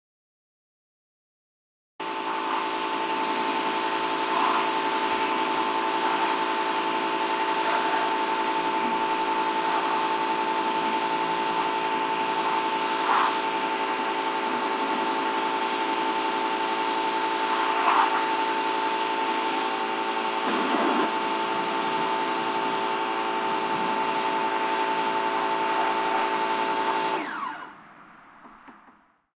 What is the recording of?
Car washing by wap
Car-washing, Cz, Czech, Panska, Wap